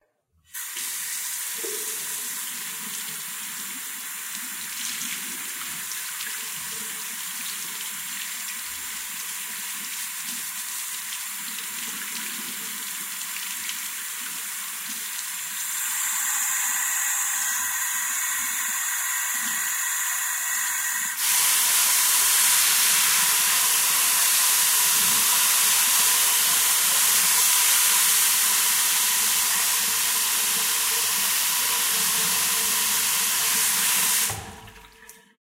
Public Bathroom Sink Faucet
Water turns on and hands are washed. Water runs slowly, then medium, then quickly from the tap as the hands are washed.
Bathroom, Faucet, Hands, Public, Sink, Wash, Water